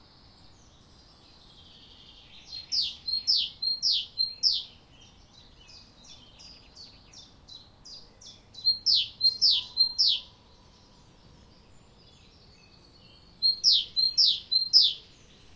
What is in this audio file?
The call of a great tit, recorded with a Zoom H2.